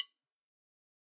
Drumsticks [Dave Weckl Evolution] simple one №1.
bronze, bubinga, click, Cooper, crash, custom, cymbals, drum, hi-hat, hit, metronome, one, shot, snare, sticks, turks, wenge, wood, Young